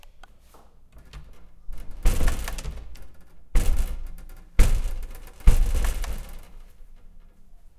deur theaterzaal
house-recording, domestic, home, indoor